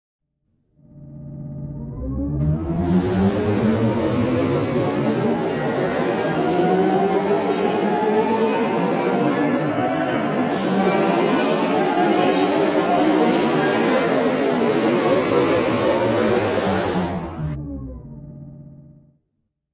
Psychedelic Atmo
psychedelic, horror, abstract, Atmosphere